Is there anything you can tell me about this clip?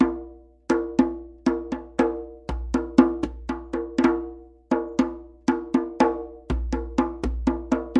djembe grooves kassa 120bpm
This is a basic Kassarhythme I played on my djembe. Homerecording.